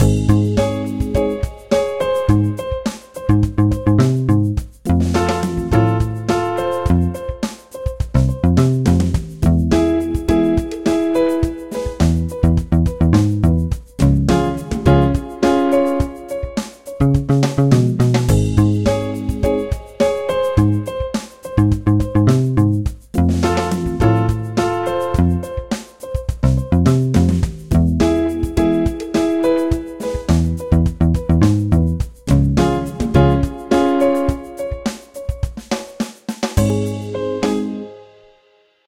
Nothing is Happening
Our heroes are waiting for the hacker girl to finish her job.
A pop playlist is running in the background.
Although I'm always interested in hearing new projects using this loop!
bass, drum, filler, fragment, keys, motif, music, neutral, nothing, piano, simple, walking